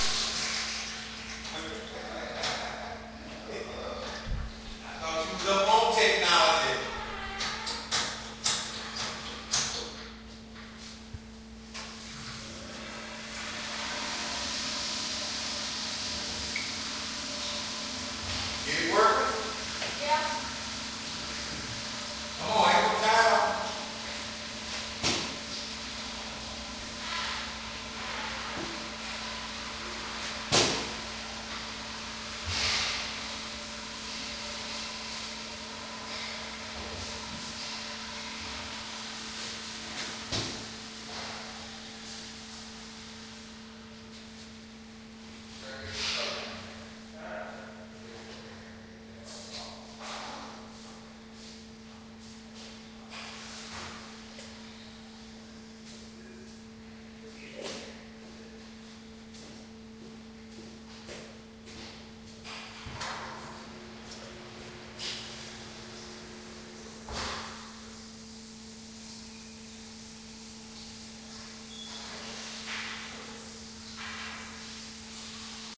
georgia informationcenter stall
The acoustically interesting bathroom inside the Georiga Visitor Center recorded with DS-40 and edited in Wavosaur.
road-trip, field-recording